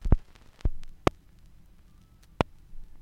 Short clicks, pops, and surface hiss all recorded from the same LP record.
noise, glitch, record, analog